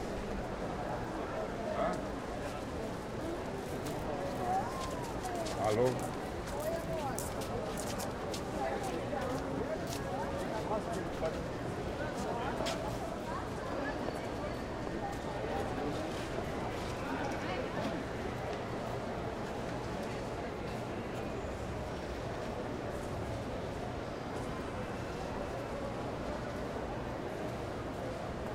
AMB MERCADO PUBLICO 4 porto alegre brasil
alegre, ambience, brazil, porto, market, public